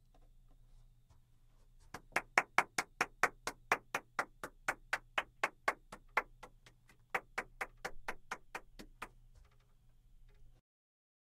One woman claping
clap, hands